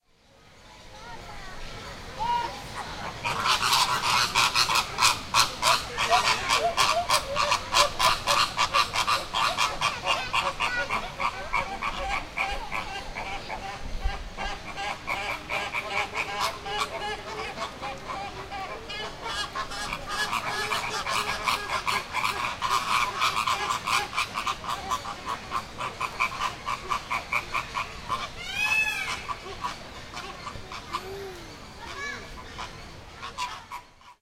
Flamenco de Cuba 01
Calls of a small group of American Flamingo (Flamenco de Cuba, scientific name: Phoenicopterus ruber) and ambient sounds of the zoo.
animals, Barcelona, Birds, field-recording, Flamenco, Spain, Zoo, ZooSonor